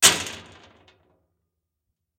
Contact mic on a large metal storage box. Dropping handfuls of pebbles onto the box.